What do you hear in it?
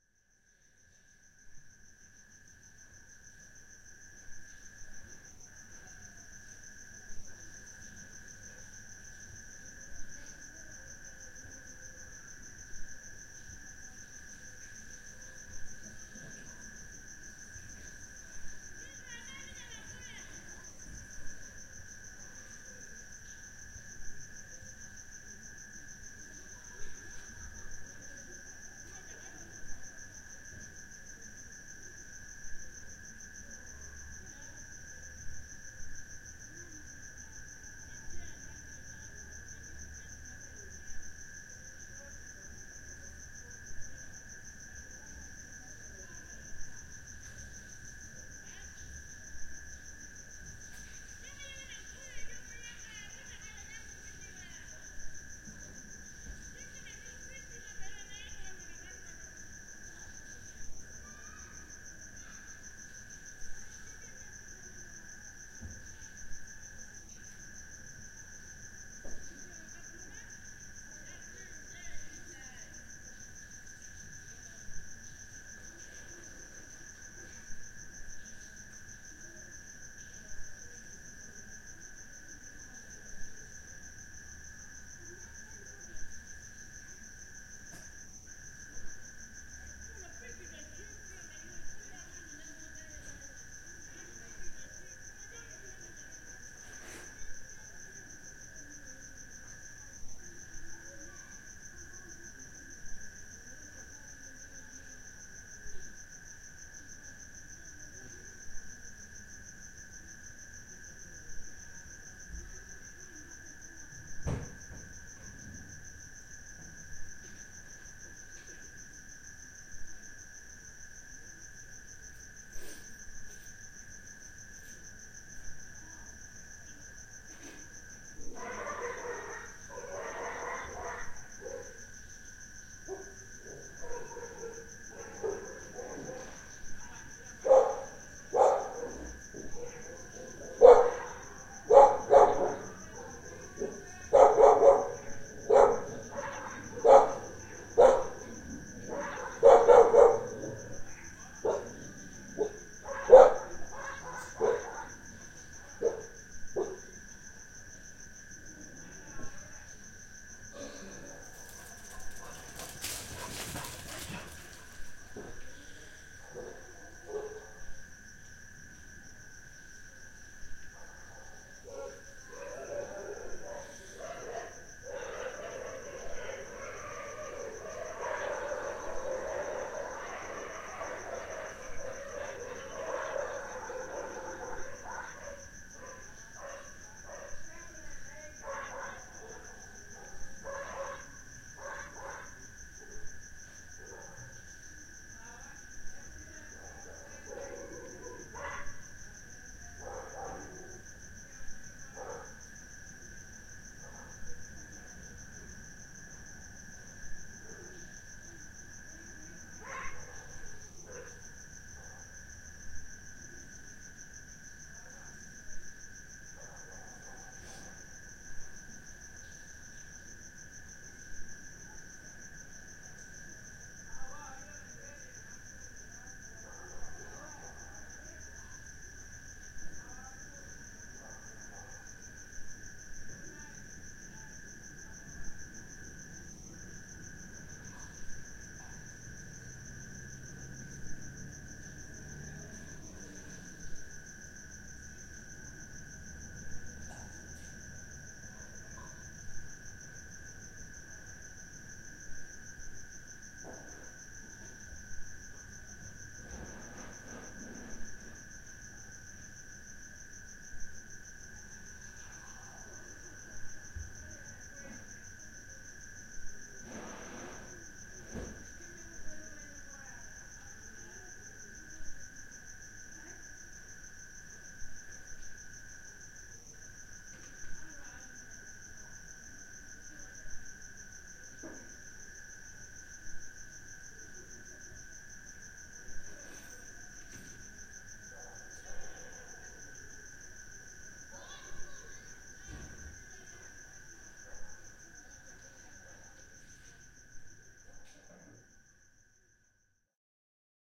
Aboriginal Community Life Evening
The sound of community life at night. Recorded on a remote australian aboriginal community.
ambience, atmos, atmosphere, australia, barking, crickets, dogs, field-recording, insects